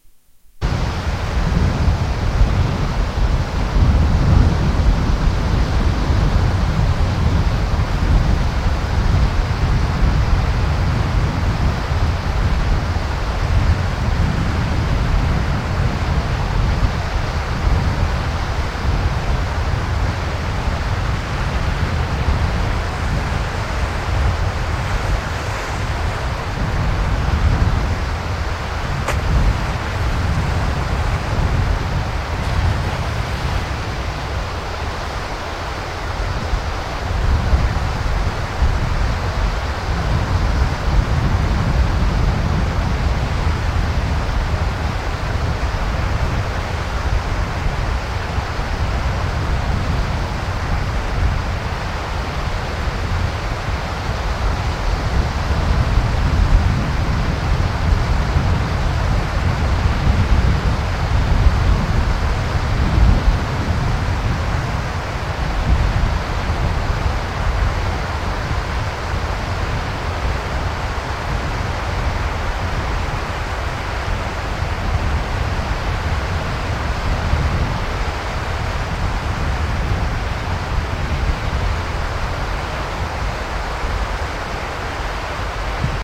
harlingen beach small waves
Field recording at Harlingen beach (Netherlands).
Small waves and some kitesurfers.